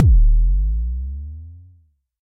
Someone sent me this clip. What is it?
MBASE Kick 02
i recorded this with my edirol FA101.
not normalized
not compressed
just natural jomox sounds.
enjoy !
kick, jomox, bd, bassdrum, analog